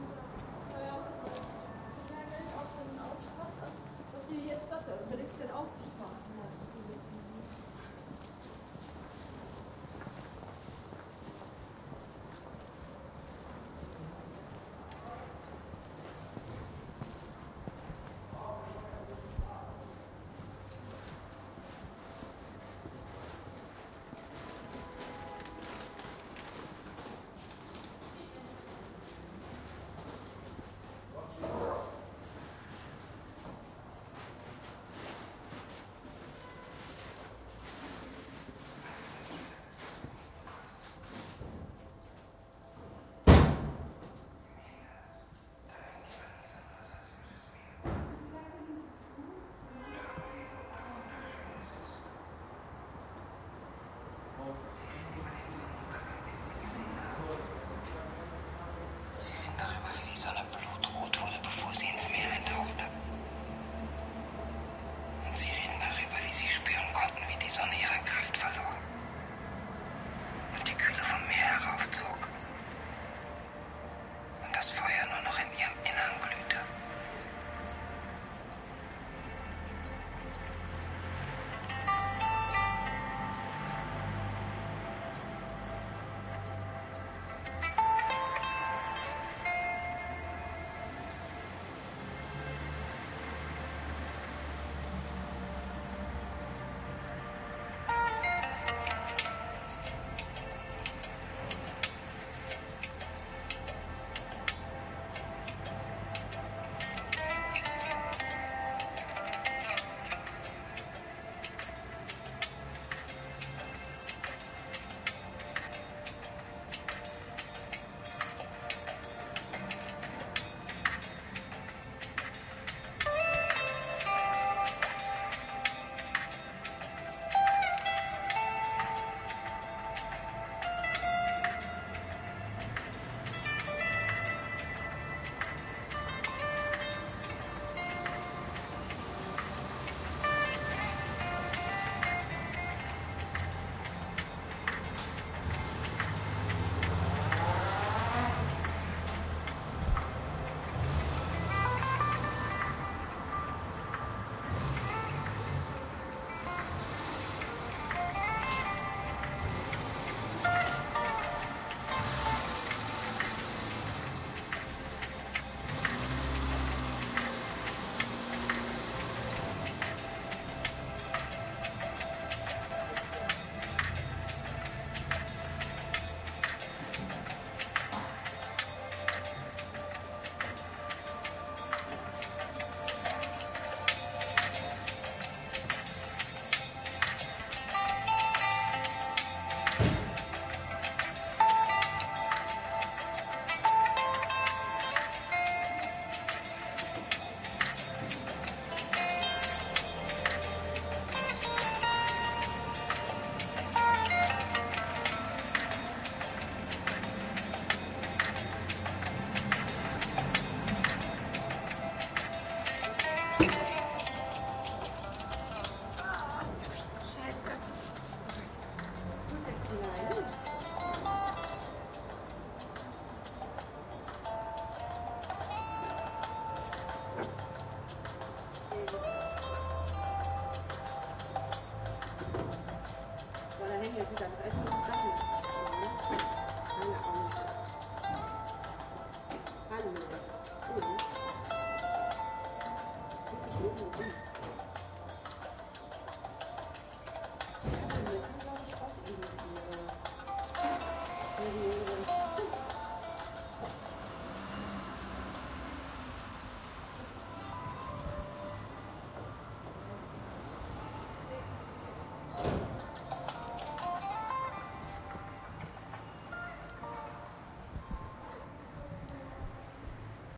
parkhaus rathaus galerie
soundscape in a parking garage that is meant to brighten the atmosphere in the parking garage a bit.
essen, rathaus, parkhaus